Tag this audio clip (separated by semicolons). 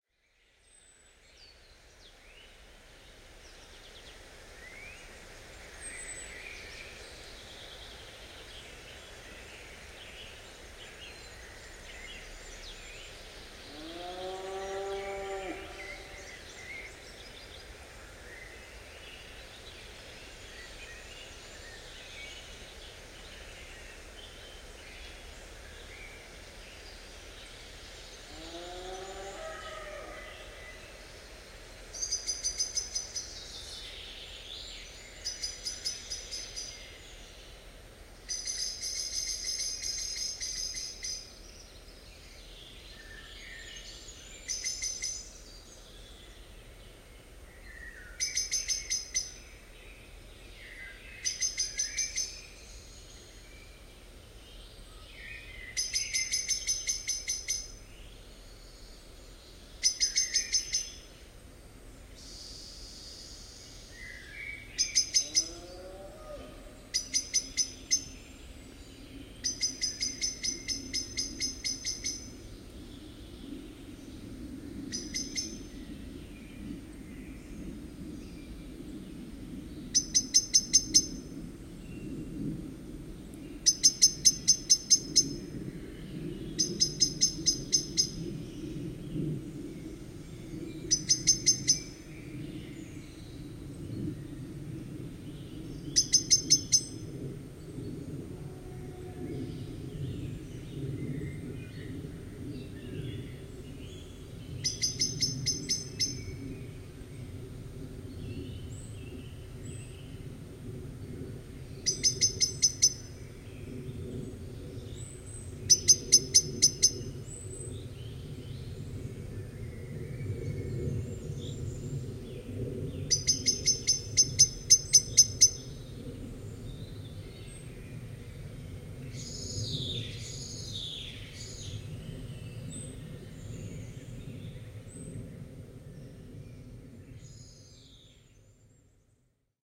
mooing; blackbird; echo; forest; cow; valley; call